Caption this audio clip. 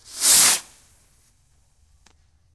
fireworks whiz bang pop crackle